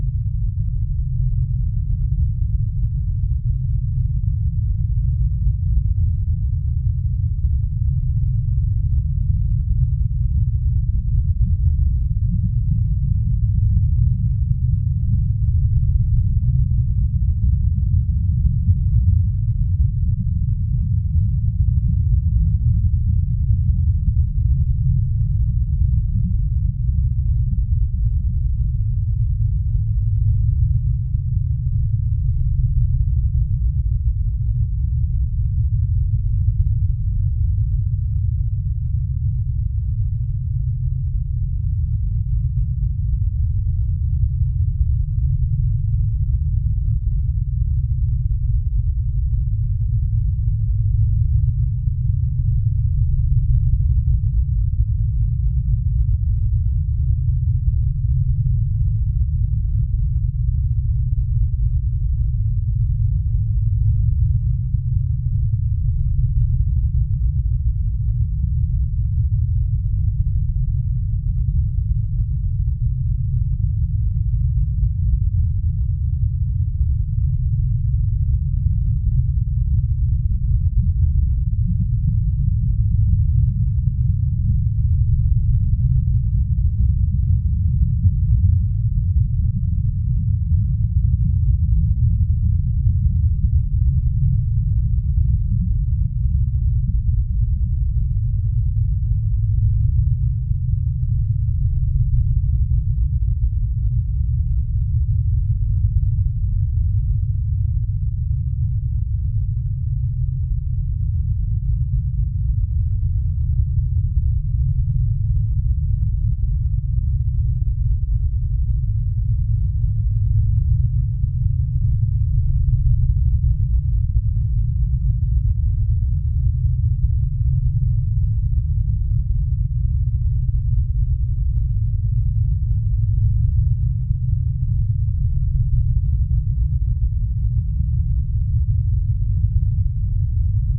Large-fire-drone
Made by filtering several times a large fire sound
Fire, forest, low-pitched